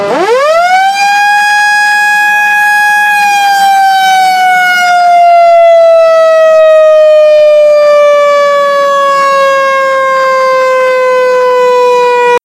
Sirena Explosió Mina
ENG:
Siren warning to an explosive in the mine.
The siren was used by the company Carbones de Berga SA and now you can find in the mine museum: "Museu de les Mines de Cercs" (Berguedà-Catalunya).
CAT:
Sirena d'avís a un explosiu a la mina.
Es va utilitzar per l'empresa Carbones de Berga S.A. i actualment es pot trobar al Museu de les Mines de Cercs al Berguedà.